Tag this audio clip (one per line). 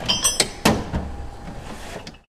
field-recording
metallic
percussion
machine